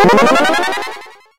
Magic Spell 09
A spell has been cast!
This sound can for example be used in role-playing games, for example when the player plays as Necromancer and casts a spell upon an enemy - you name it!
If you enjoyed the sound, please STAR, COMMENT, SPREAD THE WORD!🗣 It really helps!
angel, bright, dark, fantasy, game, mage, magic, necromancer, rpg, shaman, sorcerer, spell, wizard